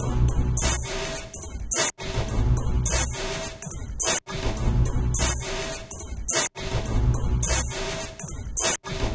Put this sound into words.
Hard Hip Hop beat made from various recently uploaded free sounds.
173164 - Bliss bass kicks
173163 - Bliss Hard Snares
172976 - Bliss - Bass stabs
173003 - puniho tape recorder
beat, breakbeat, tape, dance, bpm, percussion, sequence, 105bpm, hard, 105-bpm, retro, loop, hiss